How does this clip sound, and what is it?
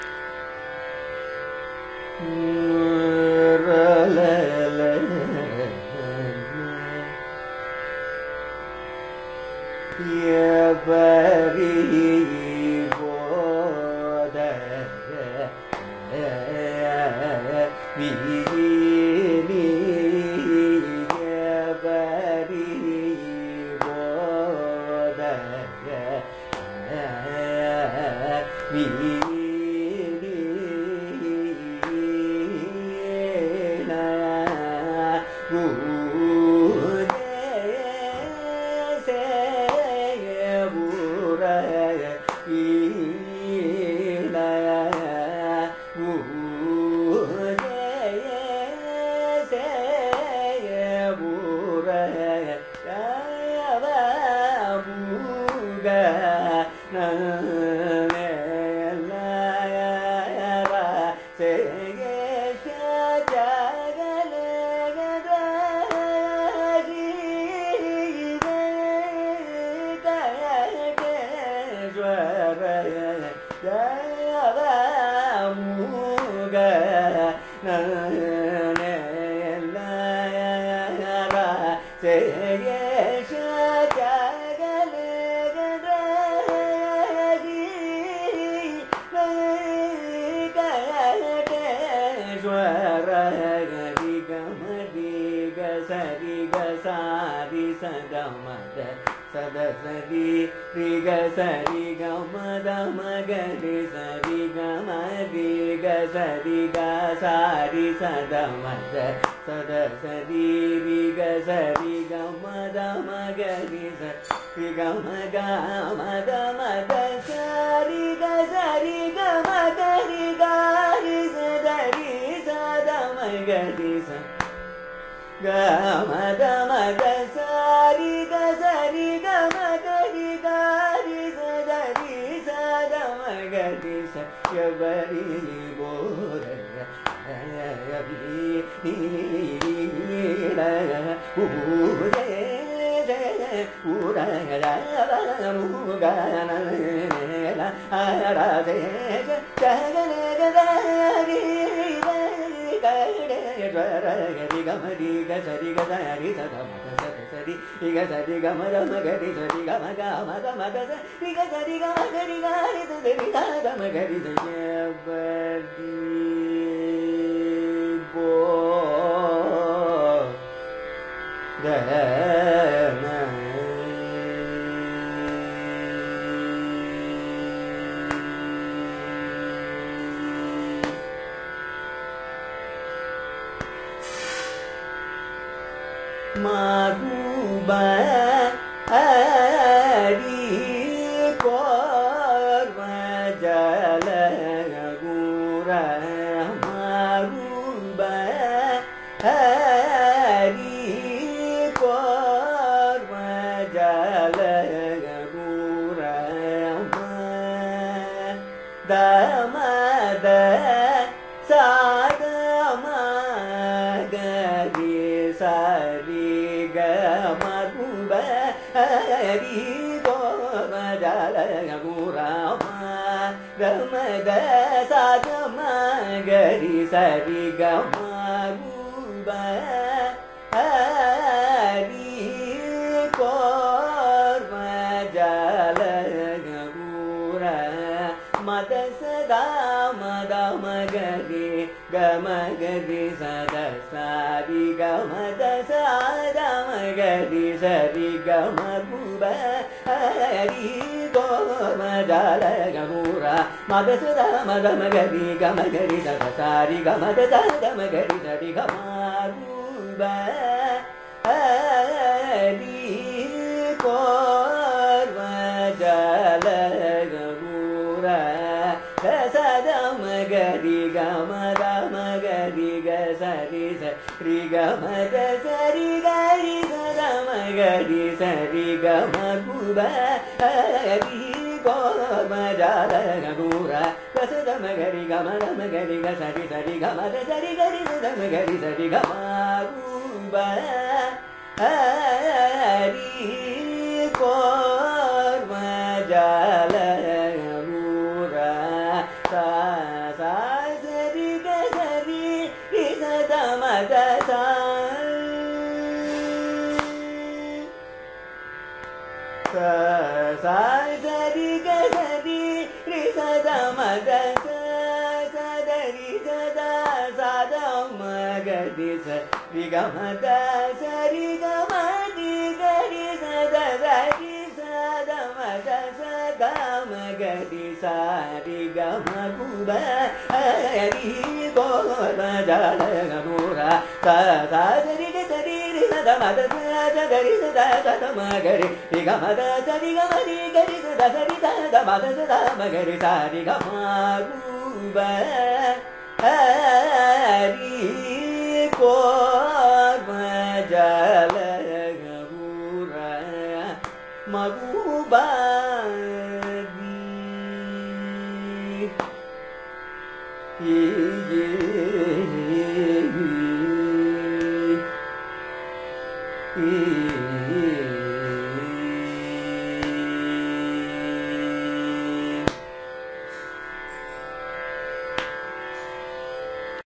Carnatic varnam by Prasanna in Abhogi raaga
Varnam is a compositional form of Carnatic music, rich in melodic nuances. This is a recording of a varnam, titled Evvari Bodhana Vini, composed by Patnam Subramania Iyer in Abhogi raaga, set to Adi taala. It is sung by Prasanna, a young Carnatic vocalist from Chennai, India.